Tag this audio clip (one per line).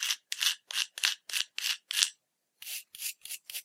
scratch
hoof
brush
horse
pony
clean